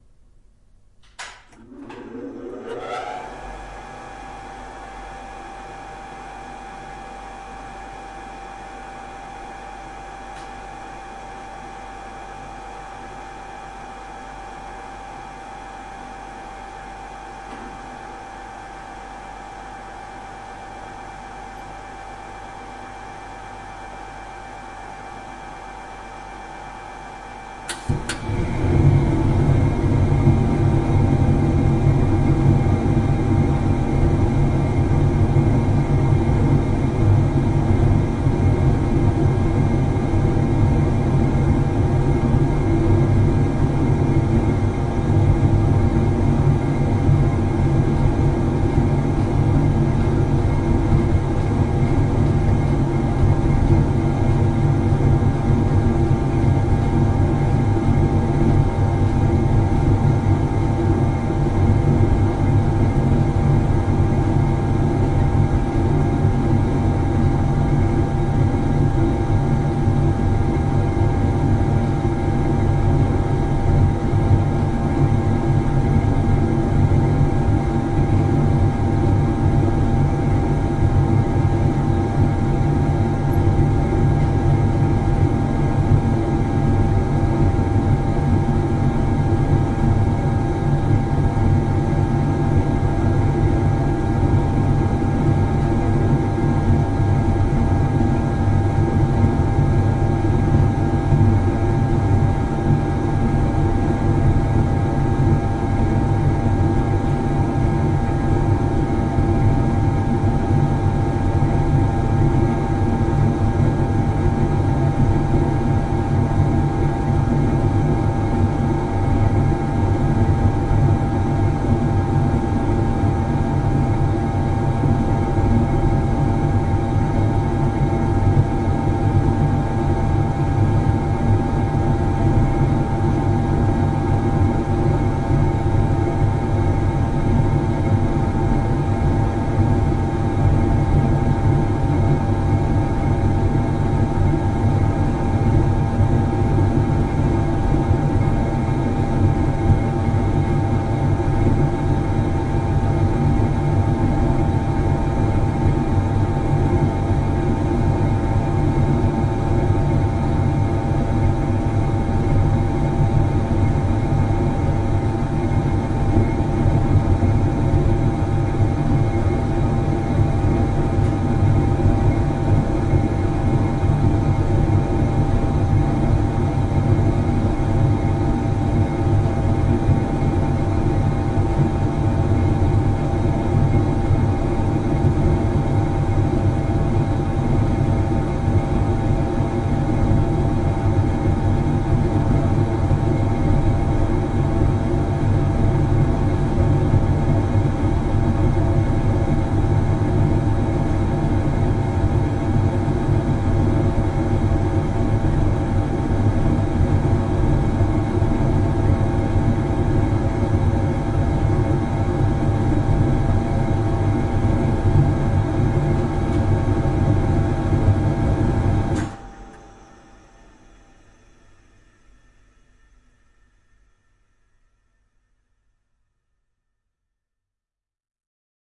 industrial gas heater
The sound of a large gas heating system from a former workshop.
Recorded for the play Faust by company Forsiti'A